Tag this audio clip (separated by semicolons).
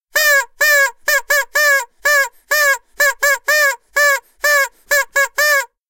horn; klaxon; trumpet